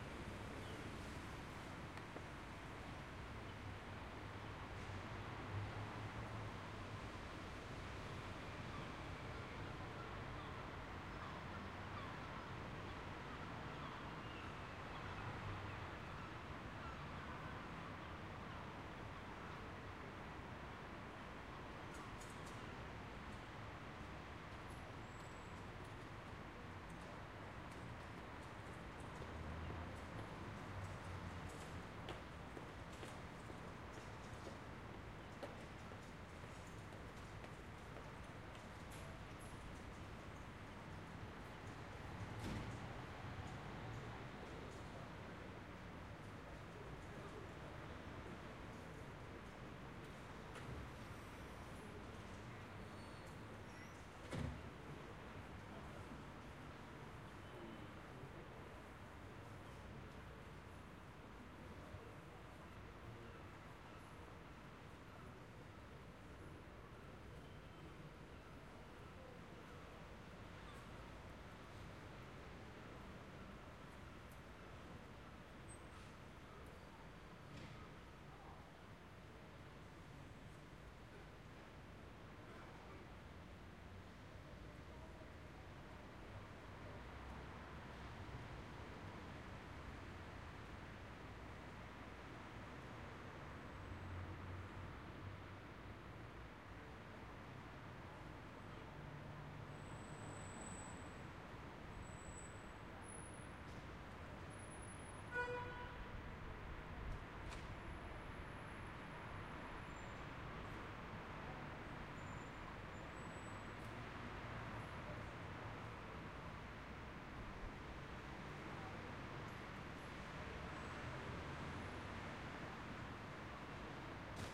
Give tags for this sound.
street; ambience

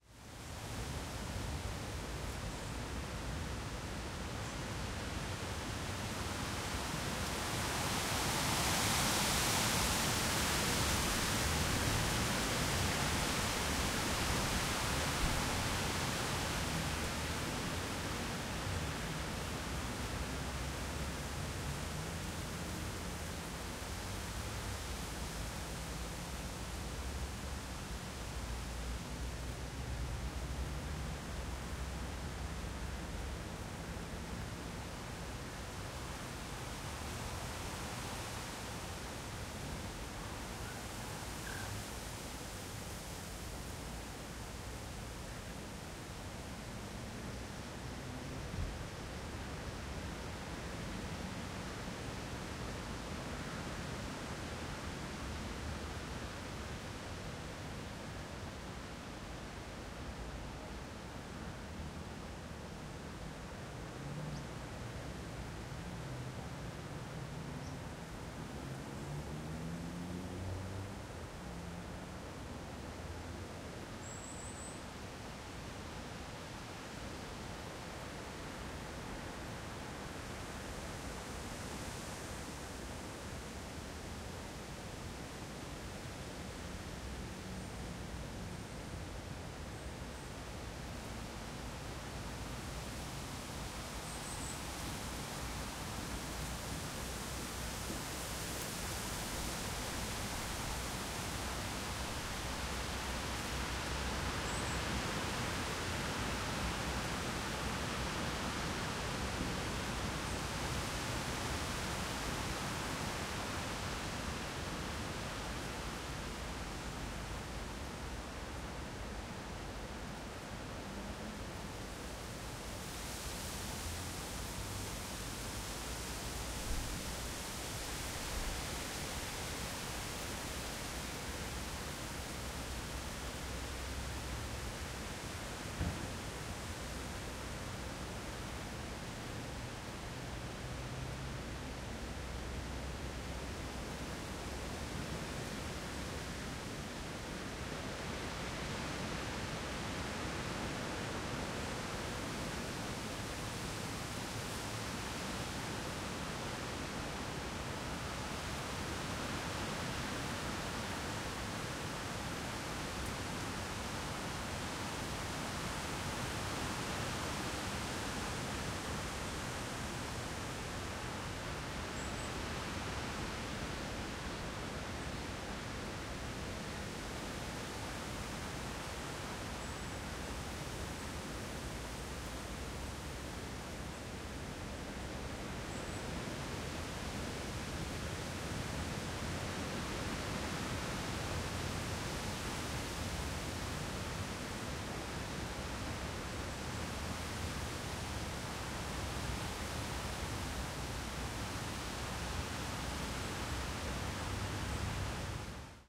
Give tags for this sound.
blowing; gale; gust; strong; trees; weather; wind; windy

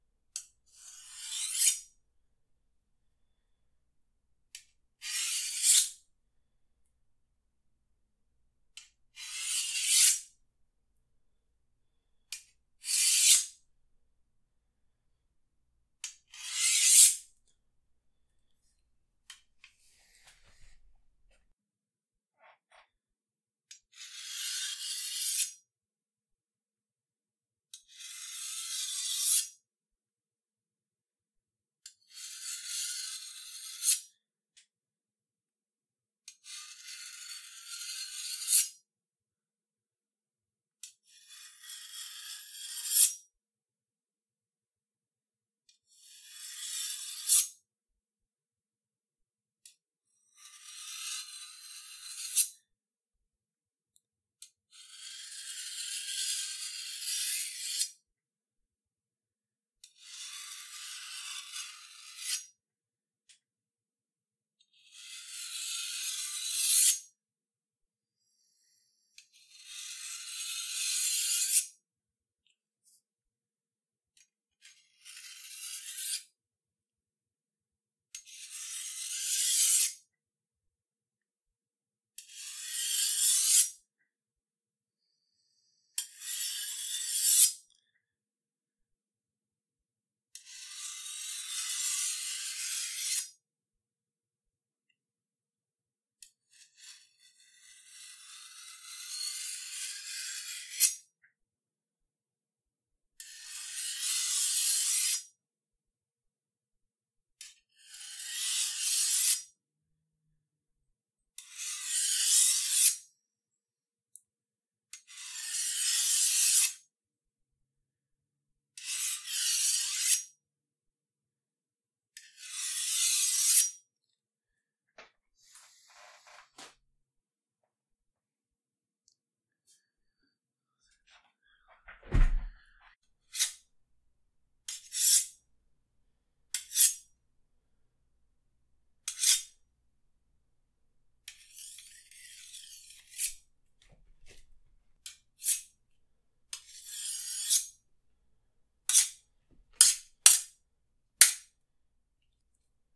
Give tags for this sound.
Fast; Scary; Knife; Sharp; Sharpening; Weapon; Horror; Metal; Grating

